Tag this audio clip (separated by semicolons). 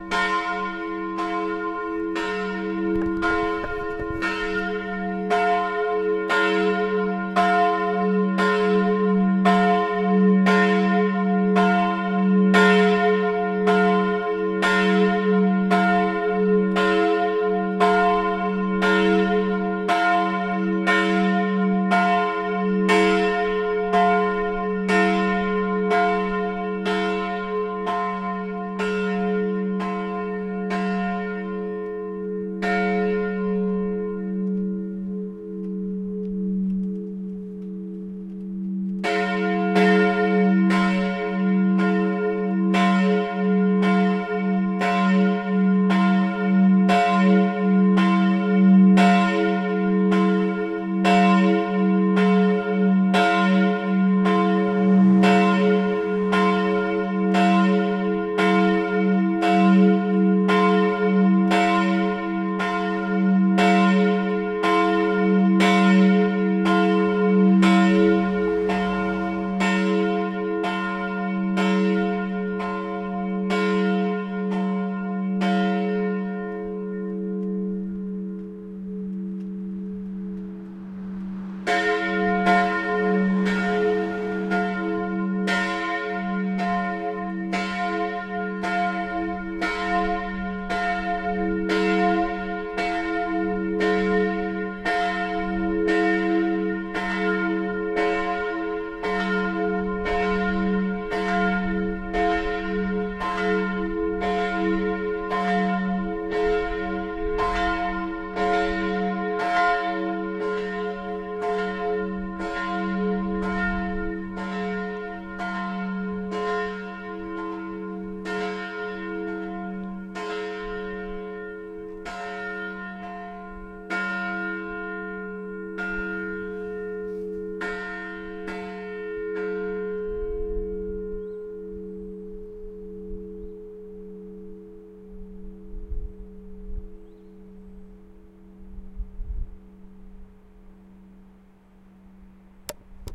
bells; Church; churchbells; Field-recording; steeg